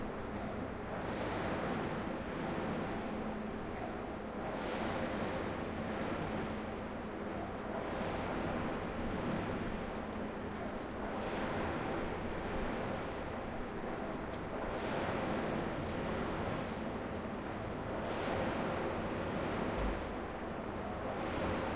field recording construction side train track bed industrial agressive massive hard